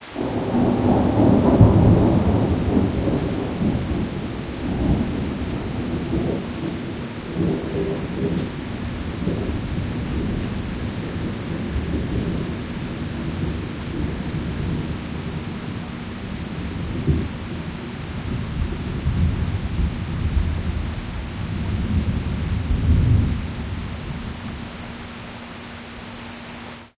Distant Thunder with Rain (2)
Rolling thunder, shot during an early-morning thunderstorm in North Carolina. Includes the distant rumble, and rain. Shot on a Sony A7Riii, edited and EQed in Ableton Live.
rolling-thunder, lightning